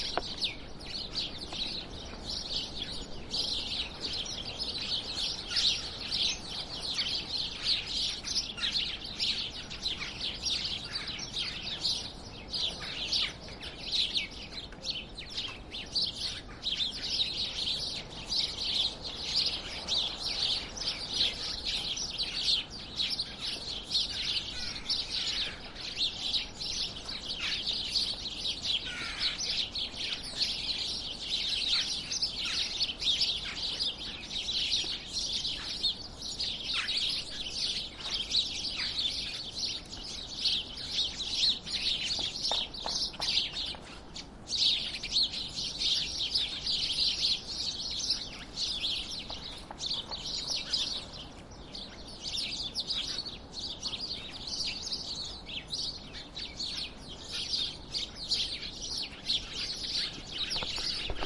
Sparrows chirping near my house.
Moscow, Russia, January 15
Recorded on Roland R-26, XY mics
ambience, city, field-recording, Sparrows, street, winter